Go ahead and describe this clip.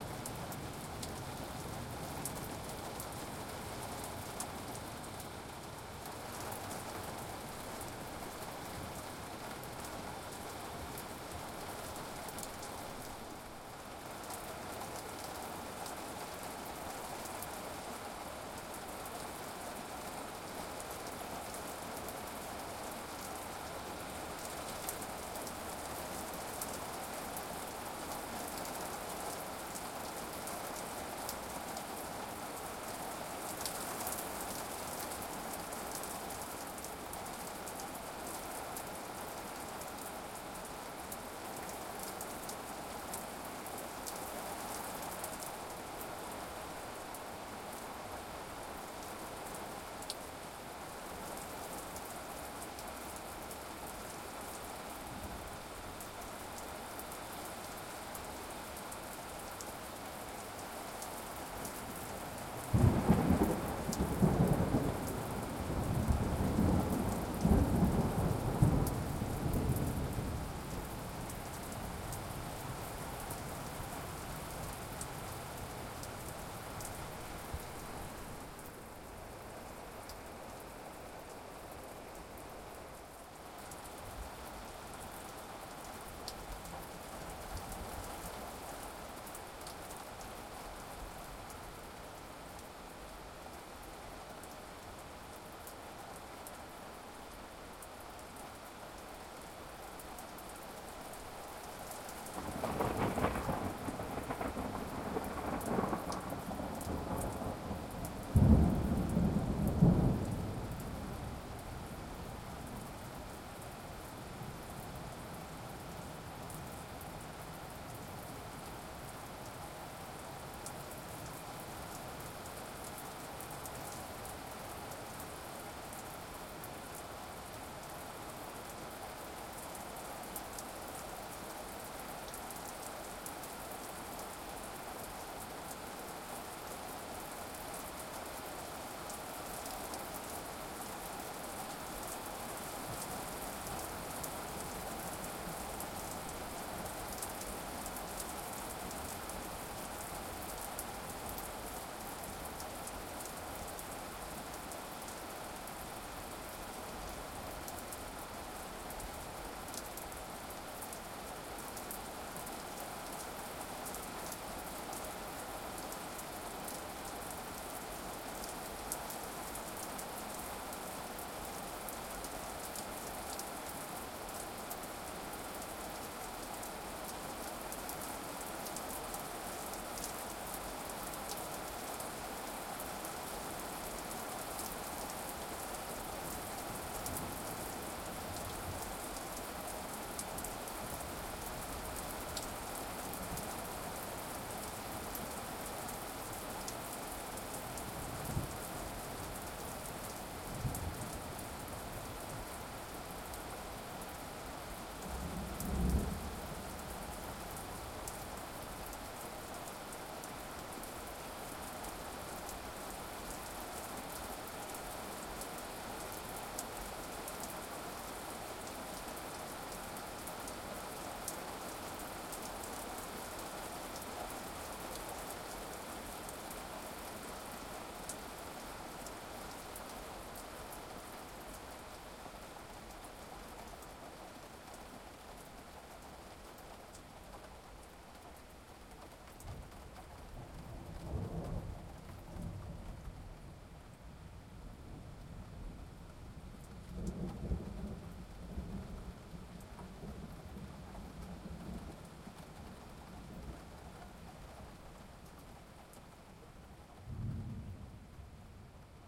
Morning Medium Storm - Atmosphere With Thunders
Recorded with:
Rode NTG3
Tascam DR40X